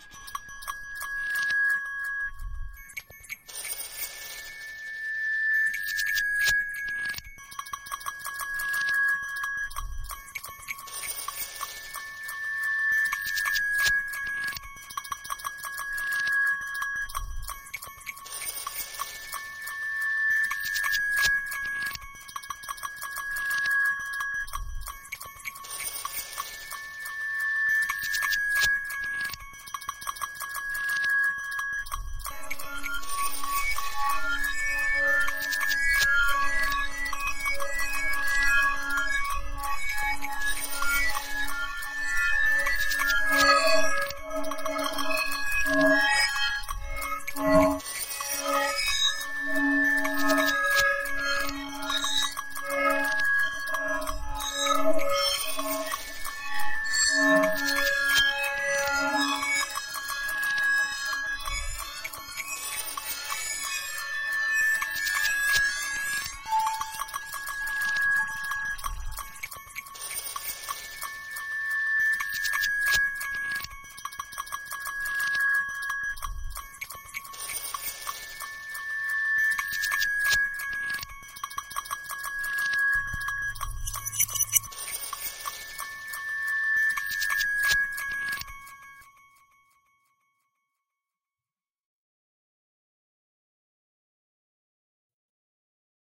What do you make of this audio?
coin + glass + bell textures
hit, percussion, bell, glass, metal, pocket, coin, metallic, texture, ring, atmosphere